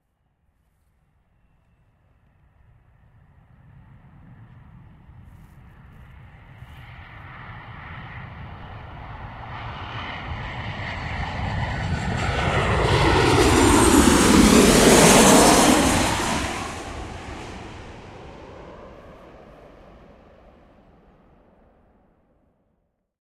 Landing Jet 4
Civil airliner landing.
aircraft, field-recording, ambiance